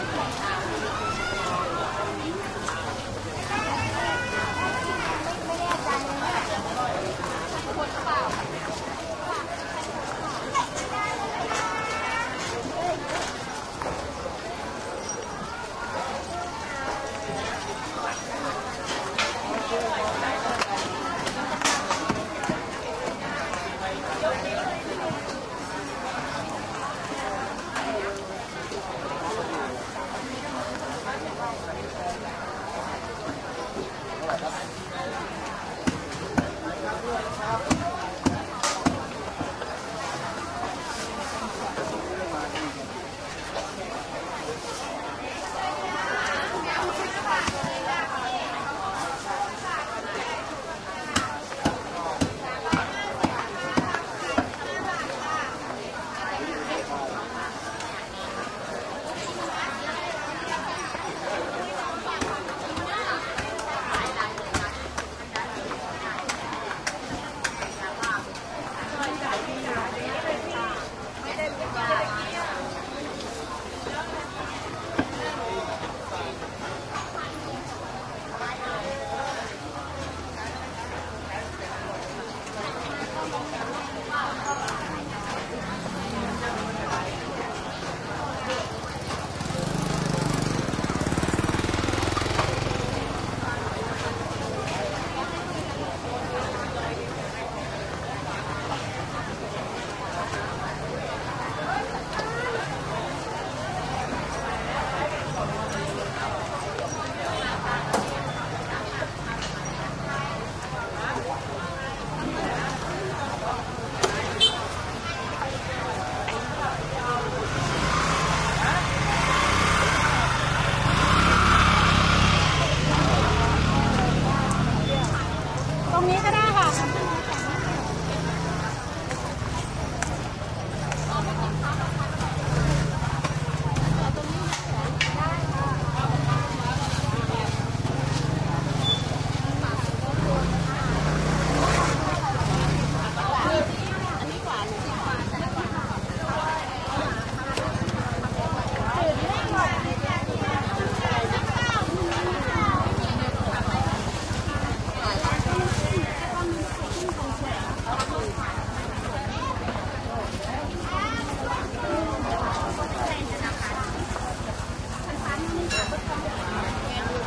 Thailand Bangkok side street market morning activity and motorcycles5
Thailand Bangkok side street market morning activity and motorcycles